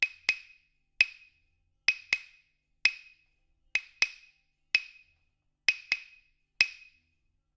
Claves (wooden blocks) played by me for a song in the studio.
blocks, claves, percussion, rhythm, wooden